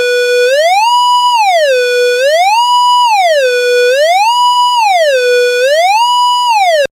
22 SIREN 8VA
22, 8va, free, mills, mojo-mills, mono, phone, ring, ring-tone, siren, tone